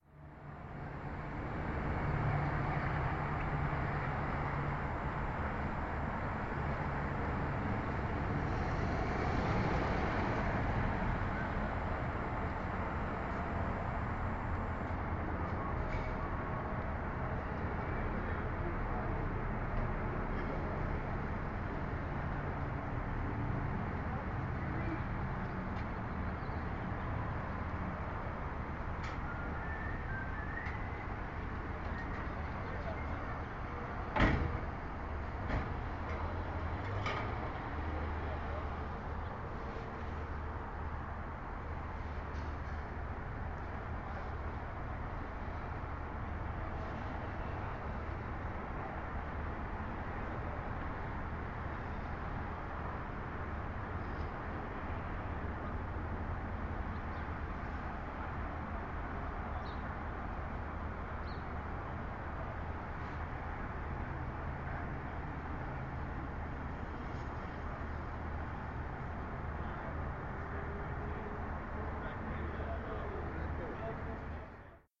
080710 01 ABQ Ambient
Test recording from my SanDisk Sansa portable. Ambient city noise from the alley behind work.
albuquerque, ambient, workers, shop, business, city